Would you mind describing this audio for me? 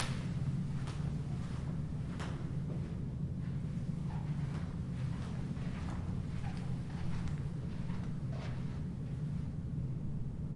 Hotel do Mar 2012-15 Hotel corridor
Hotel do Mar,Sesimbra, Portugal 23-Aug-2012 06:54, recorded with a Zoom H1, internal mic with standard windscreen.
Indoors ambience recording
5th floor of the hotel. In this area the corridor widens up creating an atrium space. The walls are naked painted concrete with a few scatered tile artworks. The floor is tilled.
This corridor has an interesting reverb.
I recorded a clap with the intention of using it to extract the impulse response of this space. But I fear the recording is far too noisy to be useful in that regard.
I also recorded myself walking past the recorder and walking back again.